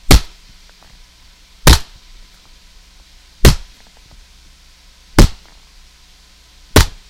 Stamp on Paper (dry)
Dry 4"x4" stamp pressed to watercolor paper